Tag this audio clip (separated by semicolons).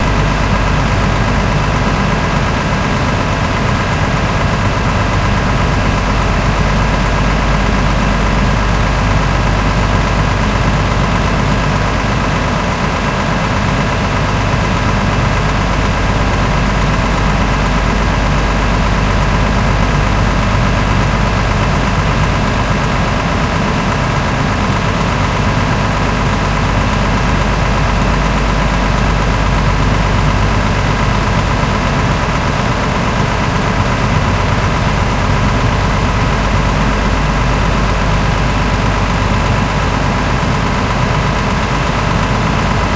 ambience; auto; bus; engine; field-recording; public; transportation